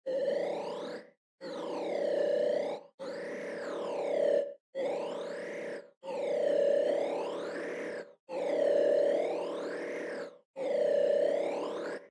SciFi Sounds
Multiple sounds of sci-fi guns, alien tech, space, or whatever you want it to be.
scifi, signals, alien, weapon